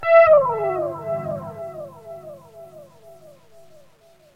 Spacey guitar sound, slide from high E string 12th fret up. Added some reverb and delay.
effect, guitar, mystic, slide, space